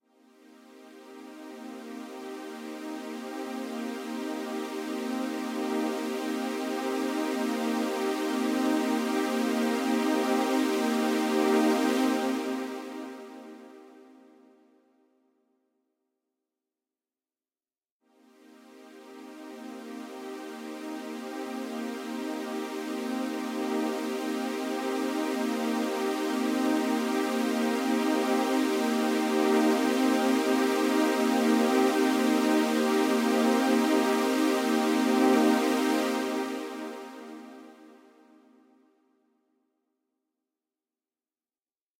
C minor dominant 7th chords sustained. Made in Reason 3. Set to 160bpm.